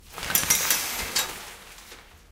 opening a shower curtain slowly